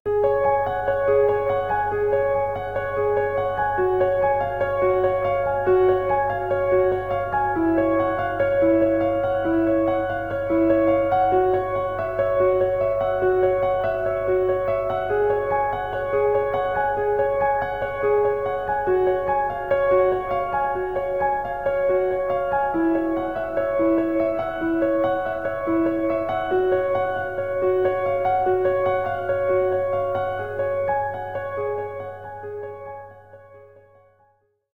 s layered piano alt
Repetitive piano melody played on my Casio synth. The same melody played with two different piano settings (ordinary piano and slow-attack piano octave higher). Second take.